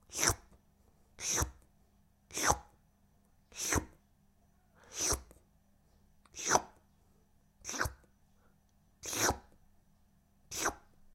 a recording of cartoon licks.
Edit.
Thanks, InspectorJ (moderator).
I uploaded this after I finish my job (for scoring a music and additional foley/sfx) and by the time for cleaning, i found some of them were not used, were not even reviewed. I have several unused items. As I have benefited several times from this website, it's time to give back. Why not.
This was recorded in my home studio, using my condenser microphone, the iSK U99 (Neumann knock-off, so the seller and a friend said to me; I wouldn't know however.) Powered by Apogee Duet Preamp and simple shock-mount, I hope this recording clean enough for many uses. I recorded in close proximity, hence the high frequency a bit harsh. No edit. No effect. Cheers.